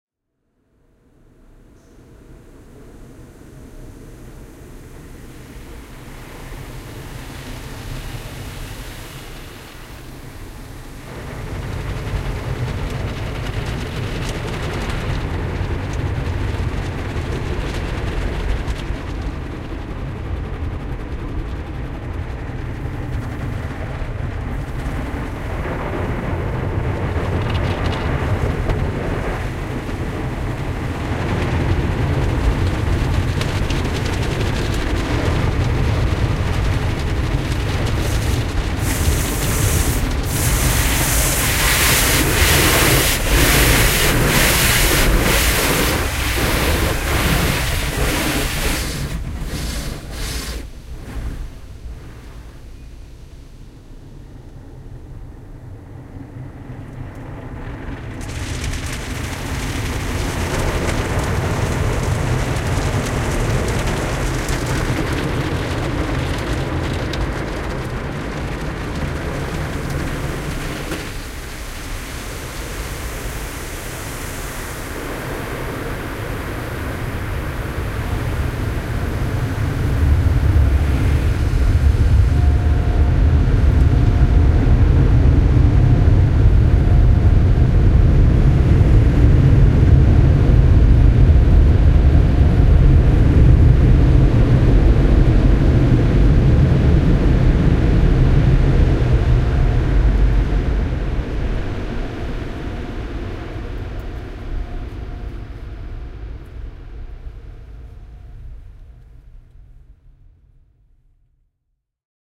Morphagene Carwash Reel
Every carwash is a little noise show!
This recording was made with a Zoom H6 (Mid-Side capsule installed) and captures the sounds of an automated carwash in action. Jets of water, rotating brushes and blasts of hot air are all included in this Morphagene-formatted Reel.
Have fun, and happy patching!
carwash, field-recording, Morphagene